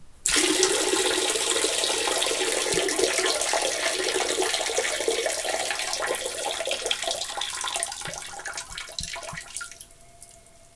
Simulated but frighteningly realistic urination sequence recorded with laptop and USB microphone in the bathroom.
noises, pee, foley, pouring, bathroom, liquid, toilet